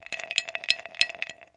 ice sounds 8
ice cubes gently rolled around in a glass
glass, ice